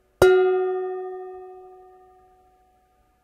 pan hit5
hitting my kitchen pan
pan, pot, hit, kitchen